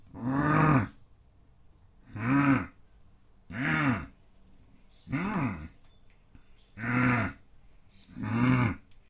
Dissatisfied grumbling for a cartoon character.
But I would appreciate a word in the comments about what kind of project you plan to use it for, and -if appropriate- where it will probably appear.